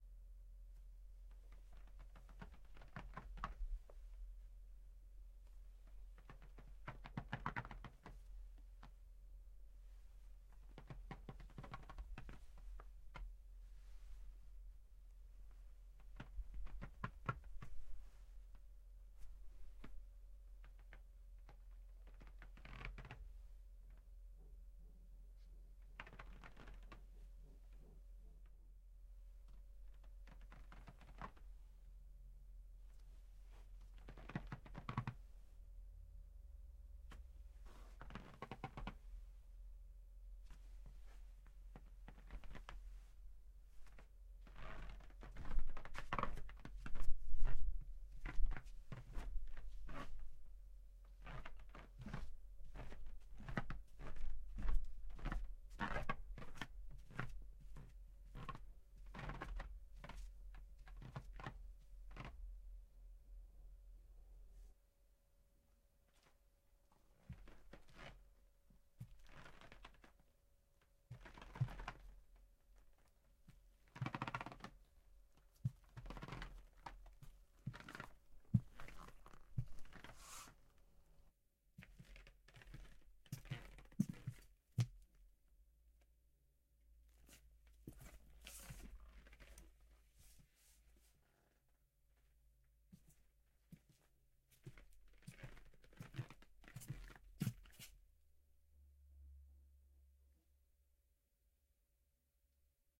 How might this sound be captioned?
walking on a creaky floor
walking slowly and with normal speed on a wooden floor, that creaks a lot. in 2 versions: with and without shoes.